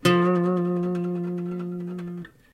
student guitar vibrato F
Vibrato notes struck with a steel pick on an acoustic small scale guitar, recorded direct to laptop with USB microphone.